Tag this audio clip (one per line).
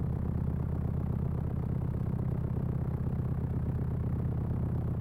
Bike
Car
Engine
Loop
Motor
Rumble
Speed
Synth
Vehicle